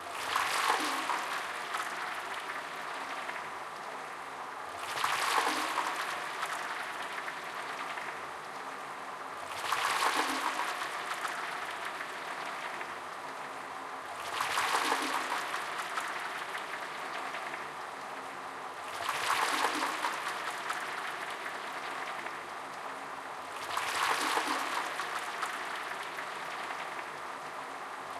This is a remix of my toilet flush sound, that´s why there´s a noise in the backround which is the flush. You can loop this easily without having unwanted noise or anything if it might be too short.